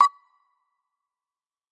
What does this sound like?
Tweaked percussion and cymbal sounds combined with synths and effects.
Abstract, Oneshot, Percussion